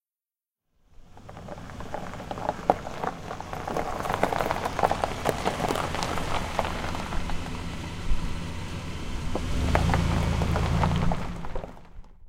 Sound of car driving on gravel; approach, stop, drive off.